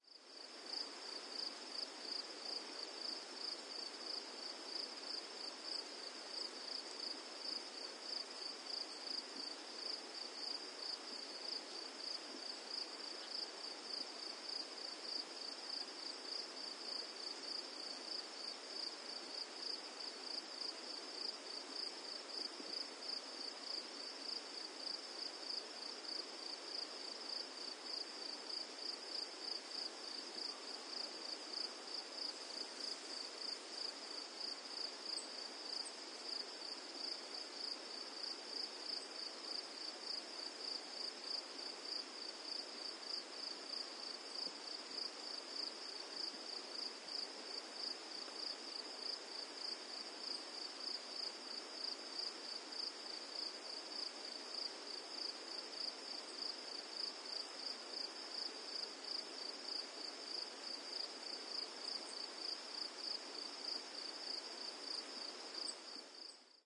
Grillons-Amb nuit(st)
Night belongs to crickets in Tanzania recorded on DAT (Tascam DAP-1) with a Sennheiser ME66 by G de Courtivron.
africa, cricket, crickets, night, tanzania